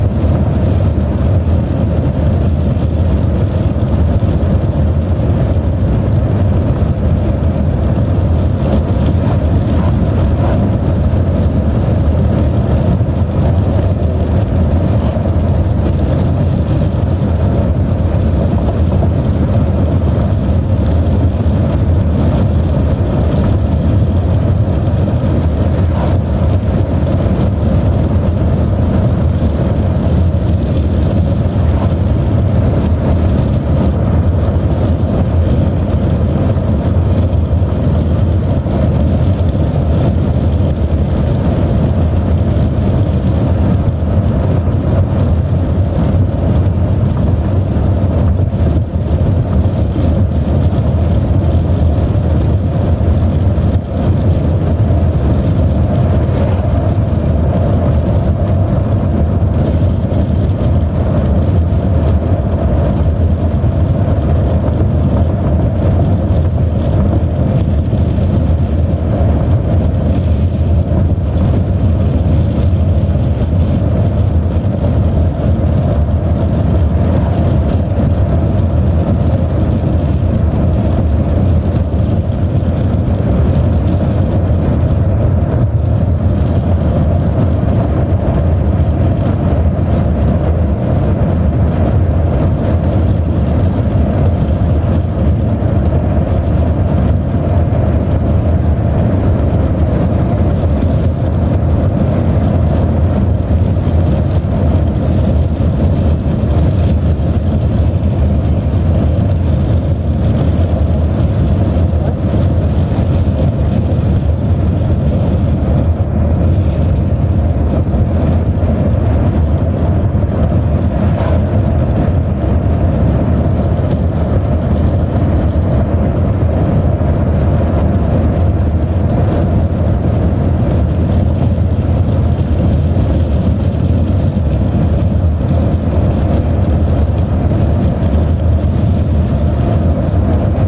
Recorded from inside a car going ~65MPH with a broken Skullcandy headset microphone. All windows were open.